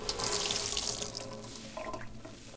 Squeezing a sponge in a sink.